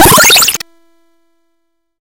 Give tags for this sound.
8 bit game sample SFX

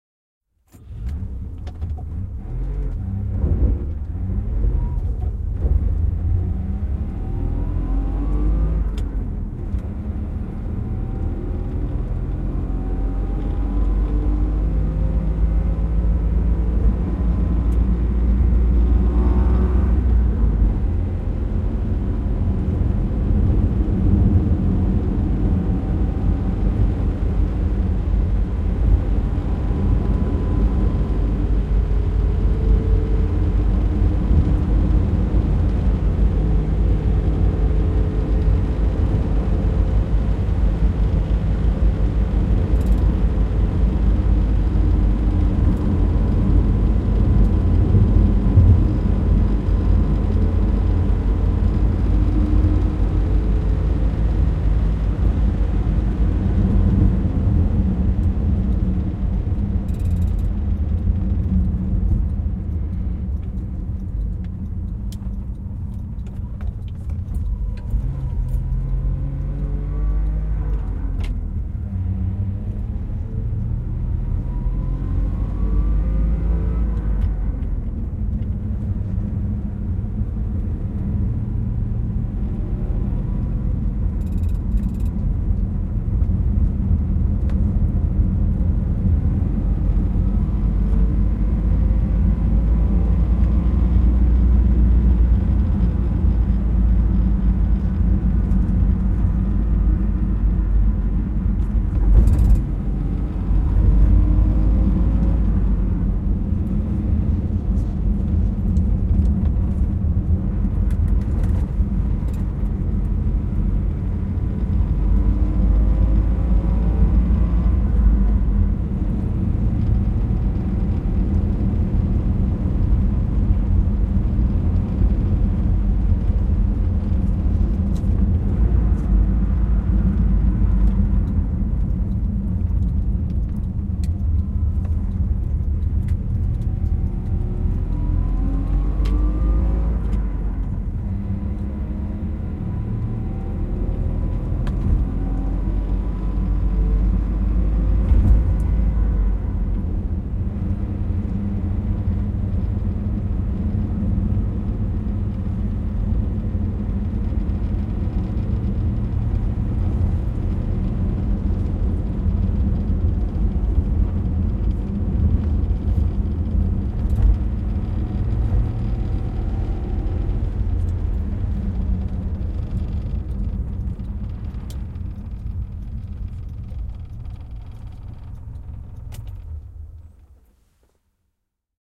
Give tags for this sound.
Car Run Soundfx Yleisradio Cars Ajo Finnish-Broadcasting-Company Ajaa Suomi Auto Drive Finland Interior Field-Recording Yle Tehosteet Autot Driving Autoilu

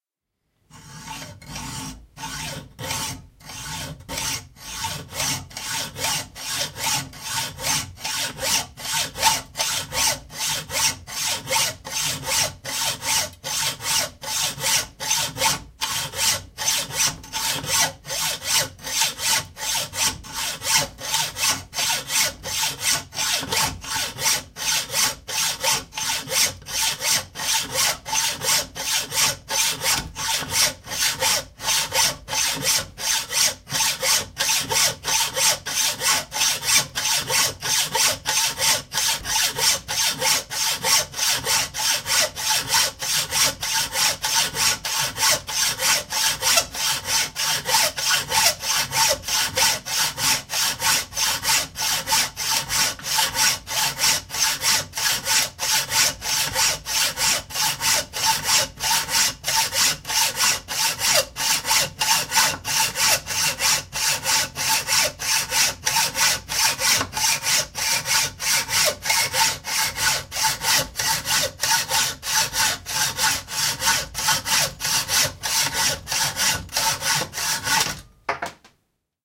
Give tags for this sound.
stereo cutting steel xy hacksaw metal

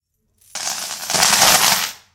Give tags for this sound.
floor chain wooden shaking hard

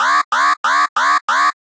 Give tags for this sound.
gui alarm futuristic

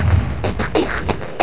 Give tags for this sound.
glitch sound-design

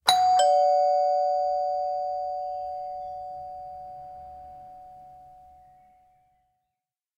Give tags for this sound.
door ringing rings bell doorbell

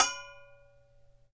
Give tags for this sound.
bell davood extended metalic technique trumpet

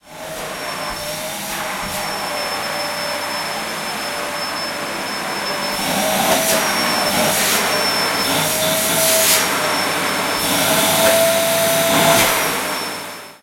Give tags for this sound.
working field-recording device electrolux engine

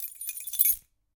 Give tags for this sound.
0 chimes egoless key natural shaking sounds vol

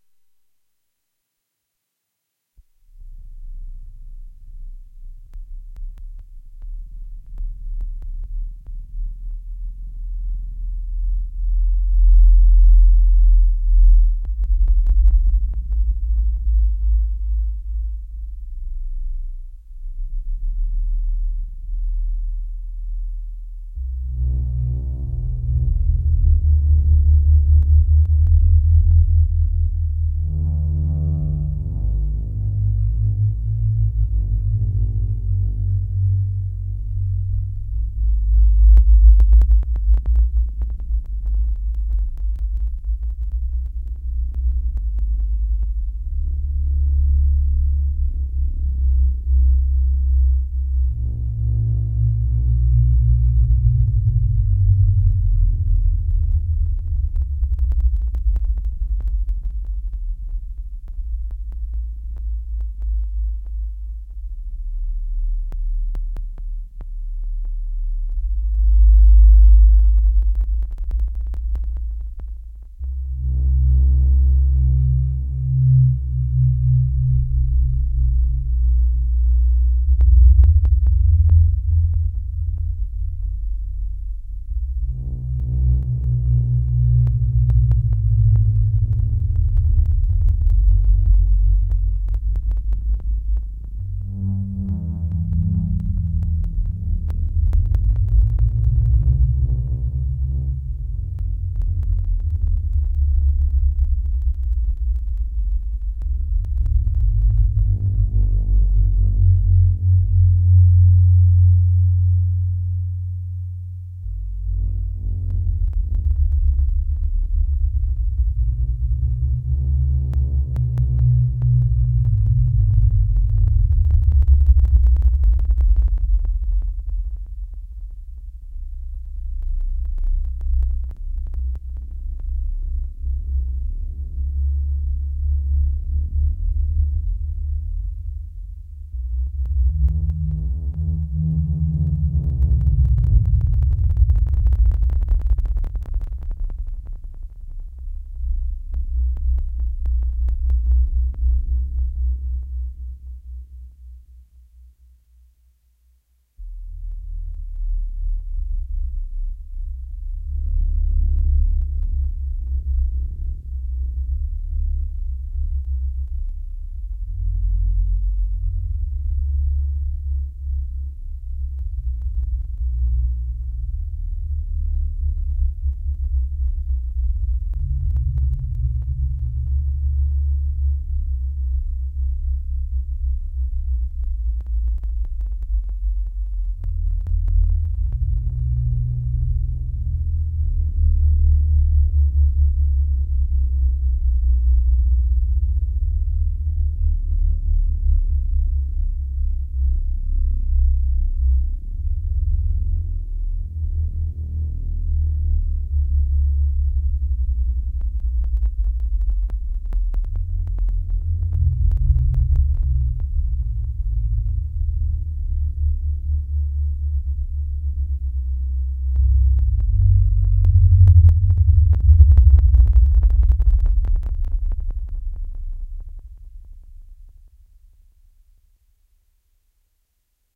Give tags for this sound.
ambiance ambient asio atmosphere bass cavern cavernous creepy dark deep drone effect fx low odds osc pad shape sinister sounddesign soundscape space sub sub-bass subwoofer triple

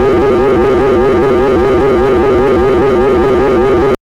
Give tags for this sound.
drone experimental noise sci-fi soundeffect

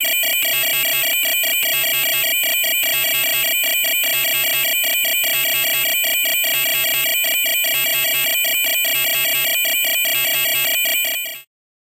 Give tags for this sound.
phone ringtone bad radio

